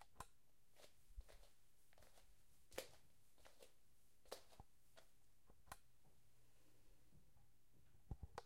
Walking across hardwood floor barefoot.